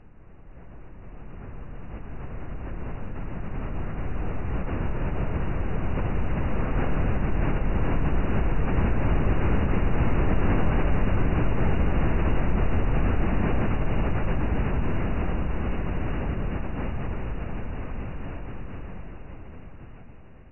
rain sound effect